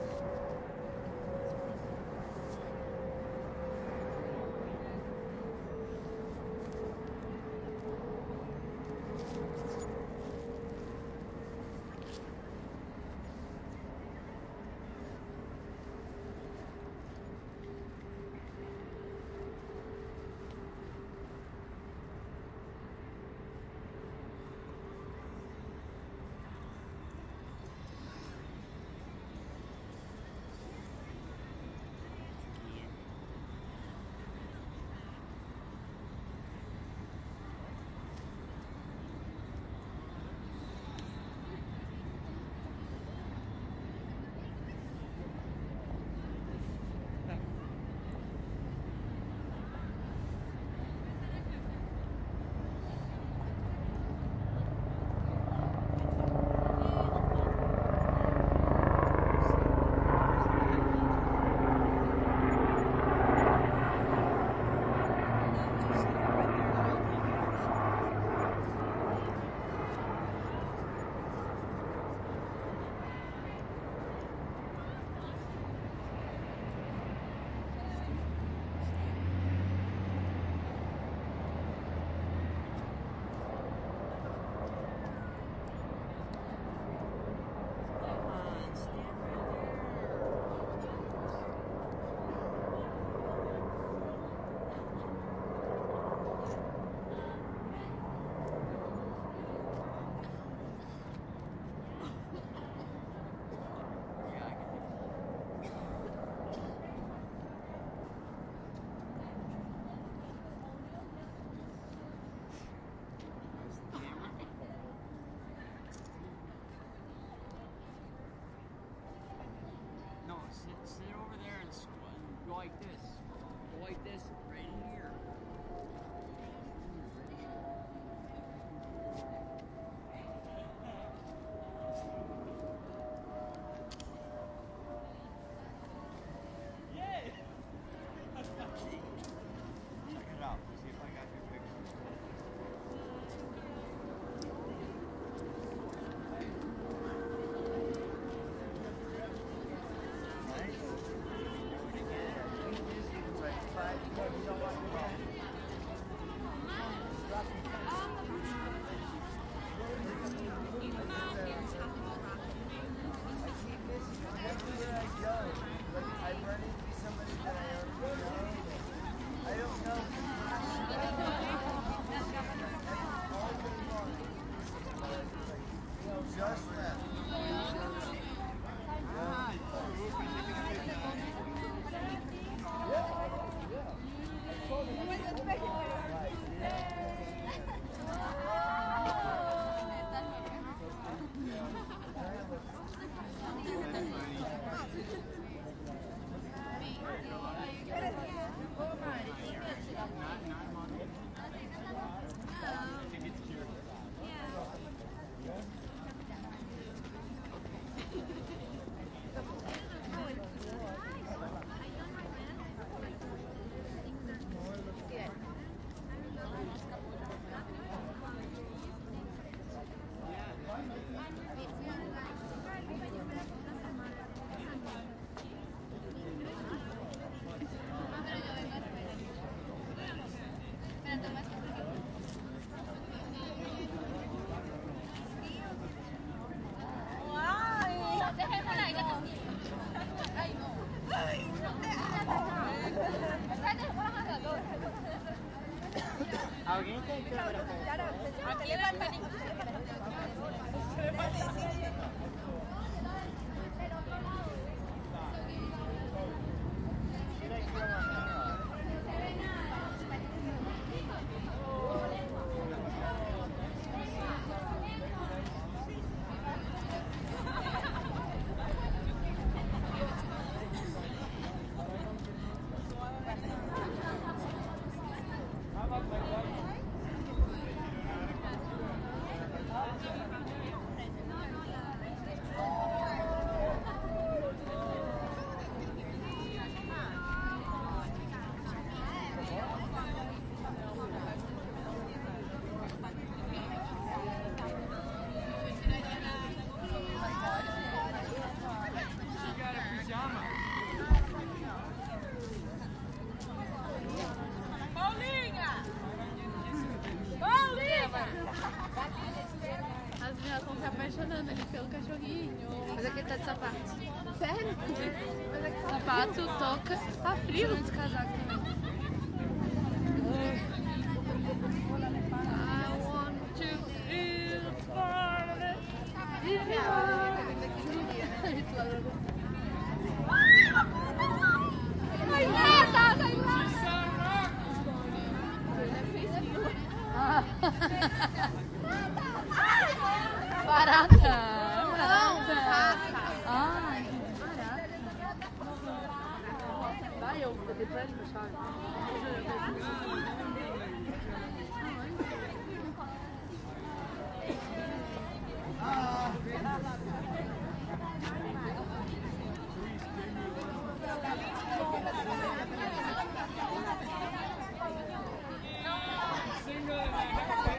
Strawberry fields ambience NYC
Strawberry fields ambience in NYC. Planes fly overhead, tourists chat and sing John Lennon songs.
ambience, john-lennon, NYC, plane-overhead, Strawberry-fields, tourists